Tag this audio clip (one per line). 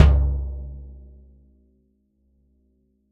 1-shot
drum
multisample
velocity